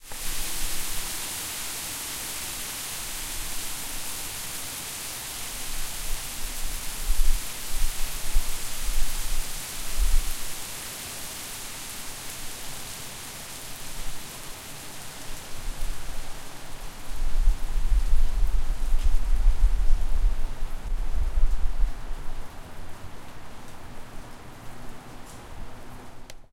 Heavy Rain & Hail - San Francisco Bay Area
Really heavy downpour of rain (or so I thought), so I headed out to capture with the Zoom H4n and realized it was HAIL! Rare for Northern California.
rain, san, francisco